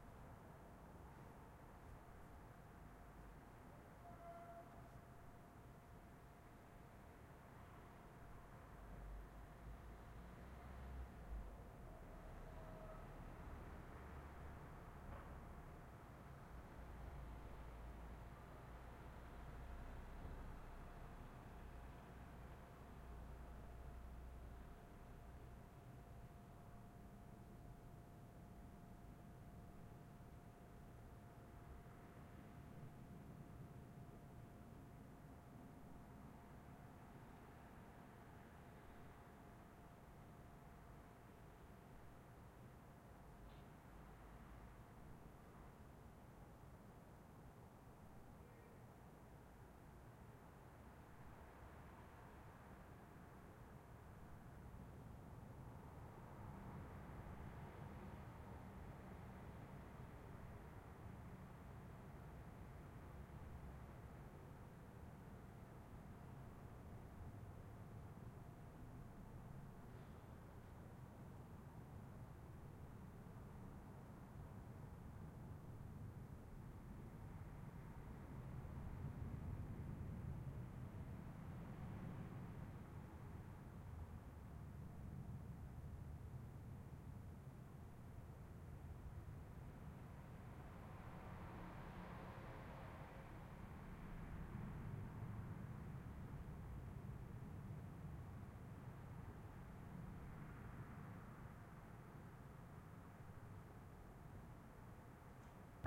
Ambience EXT night subcity court light traffic (lisbon portugal xabregas)
Ambience, court, EXT, light, lisbon, night, portugal, subcity, traffic, xabregas